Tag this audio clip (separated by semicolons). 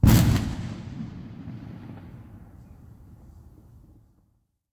bang; bomb; boom; explode; explosion; firework; fireworks; gun; missile; rocket; war